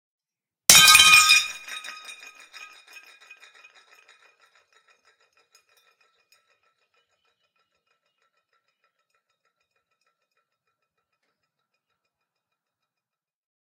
The unmistakable sound of a plate breaking on concrete